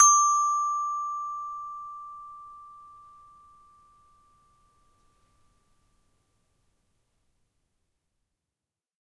children instrument toy xylophone
children, instrument, toy, xylophone